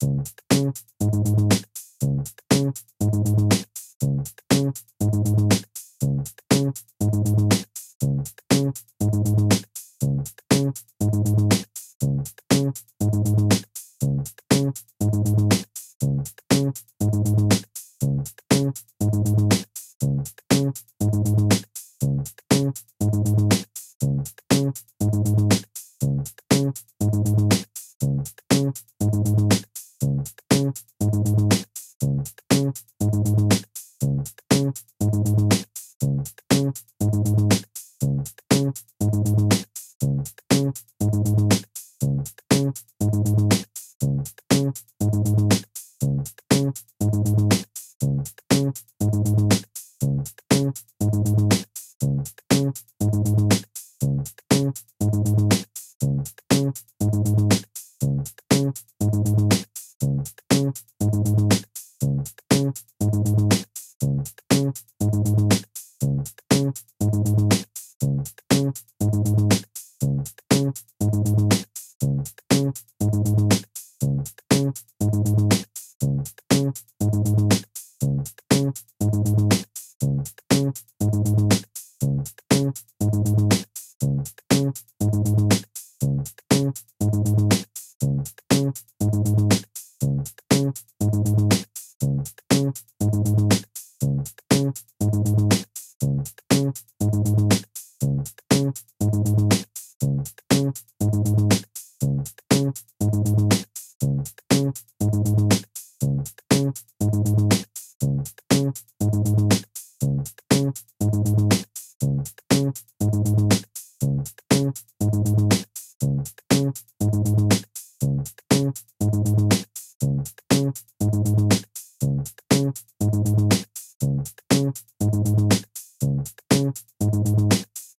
Bass loops 046 with drums long loop 120 bpm
hop, hip, loops, drum-loop, funky, drum, loop, groove, groovy, rhythm, bpm, onlybass, bass, 120bpm, 120, percs, drums, dance, beat